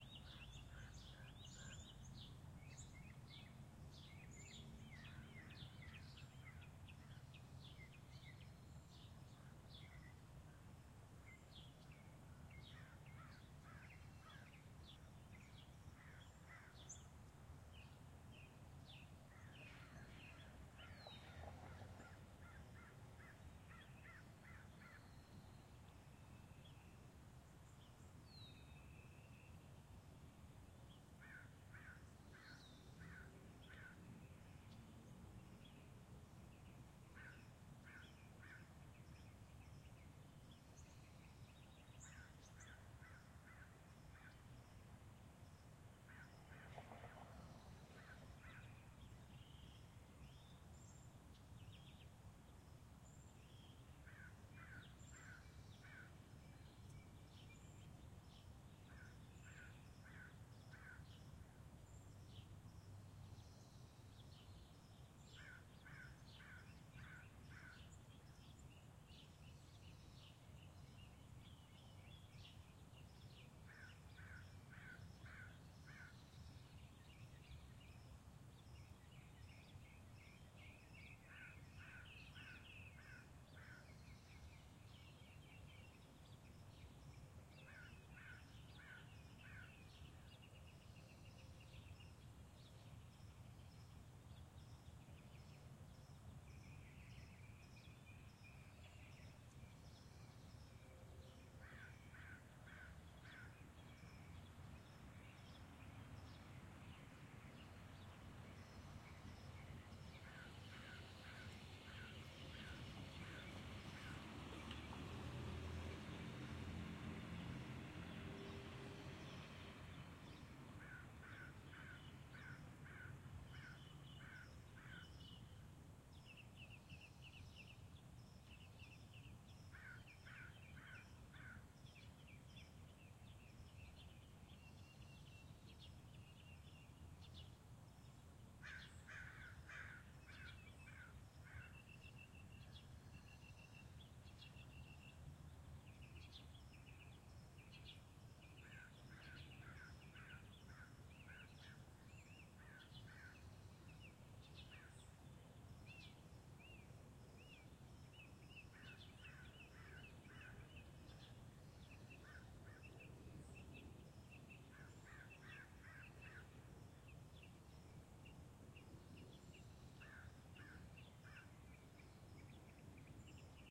AMB Ext Residential Day Stereo 003
I revisited my Los Angeles neighborhood with a brand new stereo microphone. I've now recorded the morning birds and traffic from several perspectives.
In this one, you can hear distant crows and there are several distant cars driving by.
Recorded with: Audio Technica BP4025, Fostex FR2Le